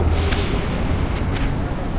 Subway Air Brakes
air, brakes, city, doors, field-record, new-york, nyc, publicing, subway, track, underground